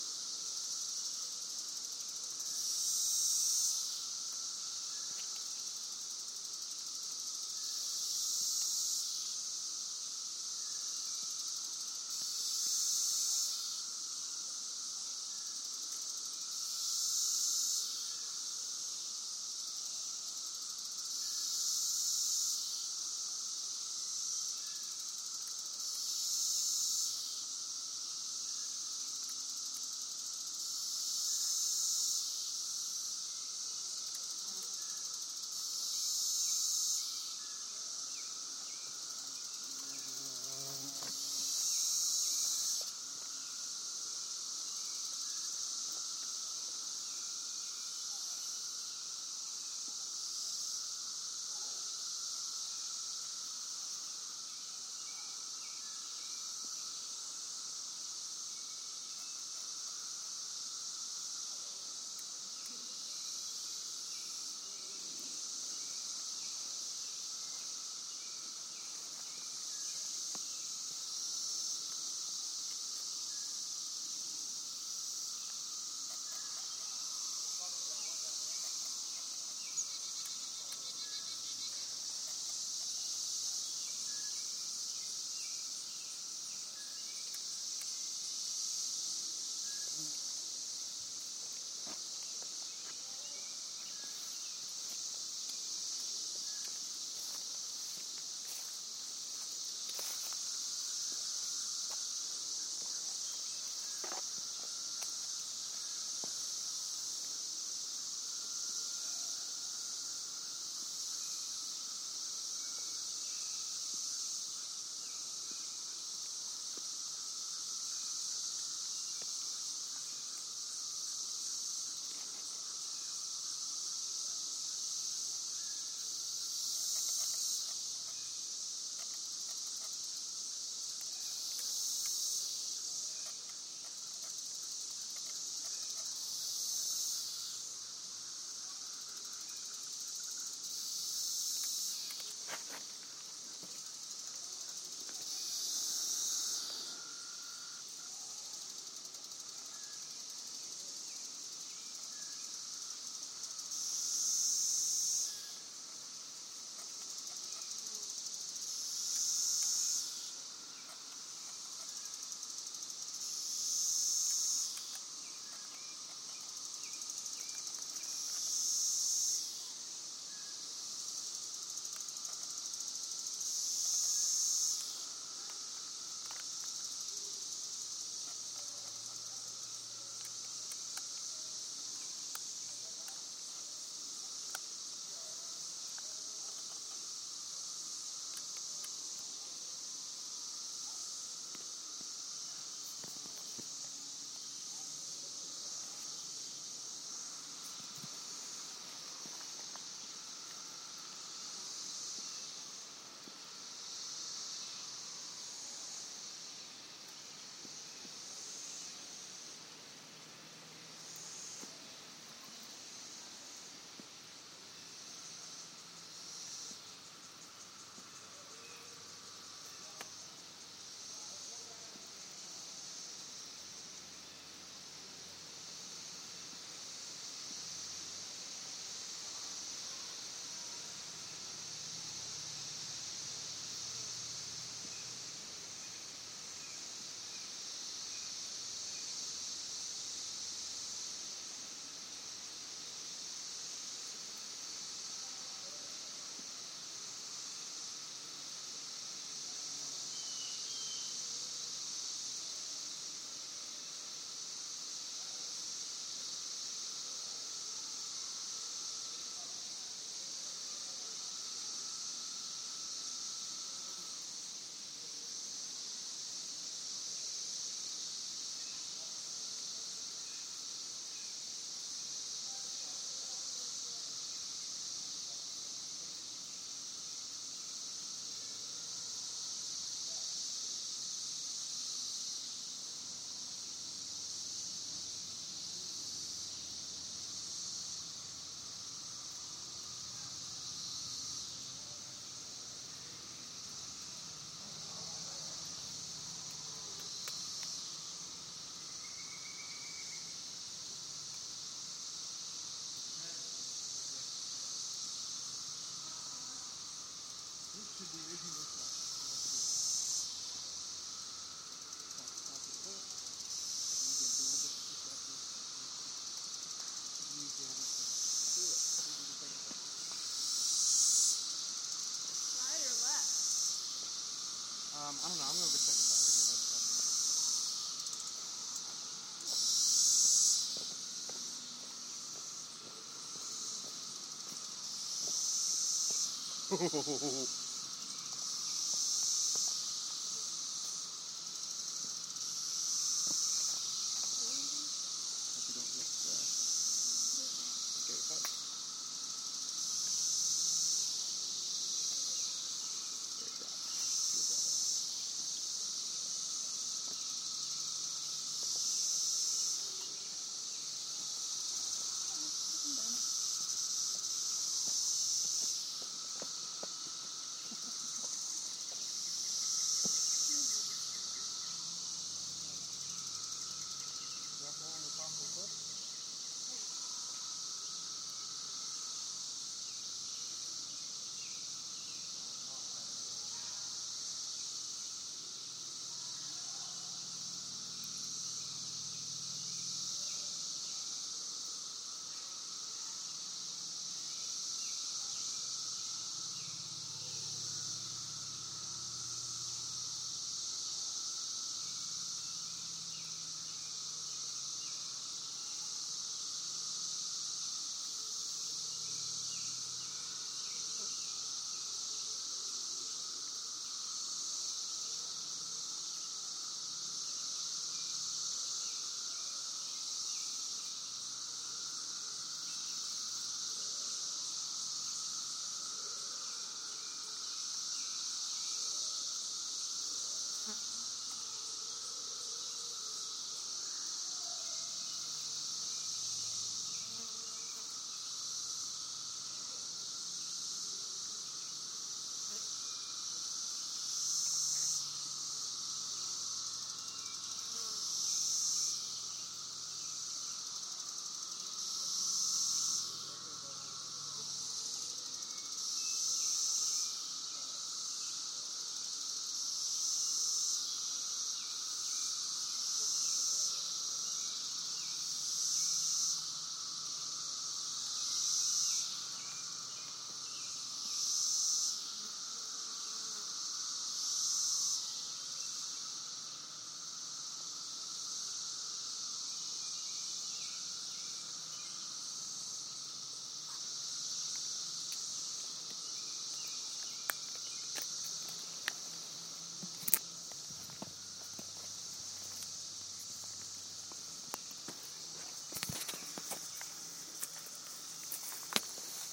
Cicadas at Rock House 2016-06-12 6:45 p.m.
2016-06-12
Rock House, Hocking Hills
Logan, Ohio
In late spring 2016, seventeen-year cicadas emerged in a section of the United States that includes eastern Ohio. The cicadas crawl out of the ground, shed their skins, and climb up into the trees. One cicada makes a loud and distinctive sound, but a whole group of them creates a dull roar.
This recording was made at the Rock House in Hocking Hills State Park near Logan, Ohio. The constant chorus is overlaid by regular M. cassini calls. The audio is occasionally interrupted by my own footsteps and by tourists talking to each other.
Sound recorded using the built-in mic on an iPhone 5.